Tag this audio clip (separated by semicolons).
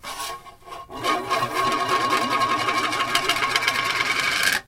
circle; disc; wobble; plate; roll; spin